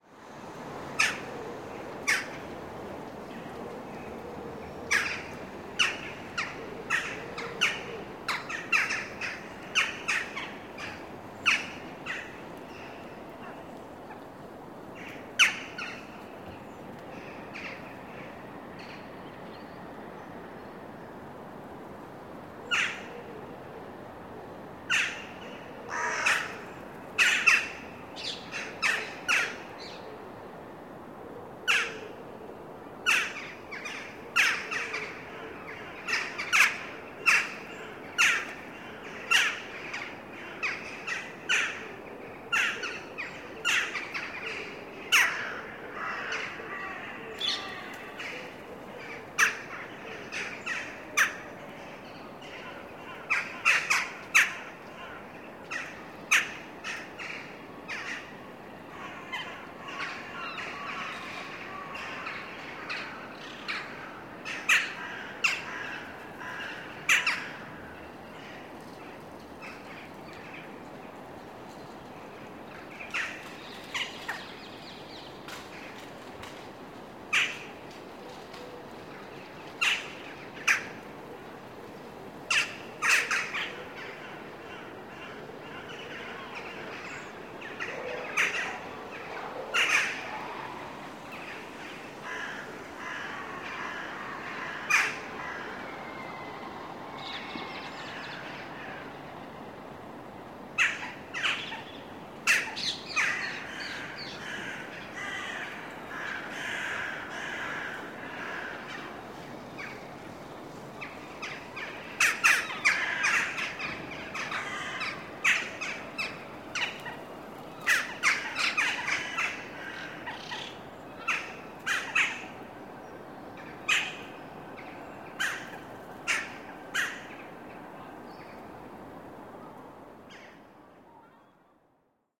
birds in the Hague at dawn 8 - blackbird
Birds singing in a city park of the Hague at dawn. Recorded with a zoom H4n using a Sony ECM-678/9X Shotgun Microphone.
Dawn 09-03-2015
netherlands, city, hague, dawn, field-recording, birds